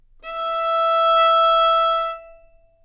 Part of the Good-sounds dataset of monophonic instrumental sounds.
instrument::violin
note::E
octave::5
midi note::64
good-sounds-id::3662
neumann-U87, violin, multisample, single-note, good-sounds, E5